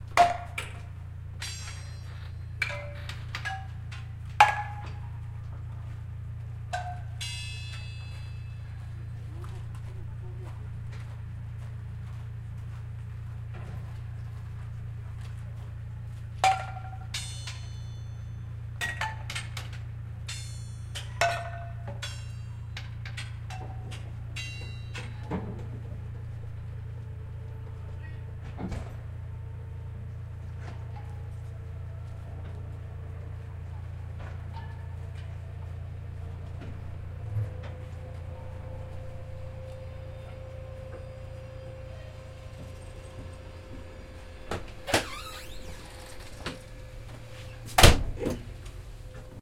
Night station. The typical knock on the oil cap - crawlers check the oil in wheelsets. Conductor creak and slam doors.
Recorded 30-03-2013.
XY-stereo.
Tascam DR-40, deadcat